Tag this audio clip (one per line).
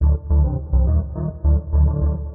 electronic
flstudio
plasma
dark